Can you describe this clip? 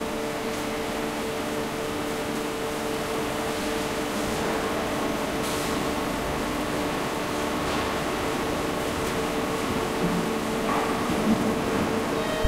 Field recording from Whirlpool factory in Wroclaw Poland. Big machines and soundscapes
Wroc, field, aw, Factory, Wroclaw, machines, Poland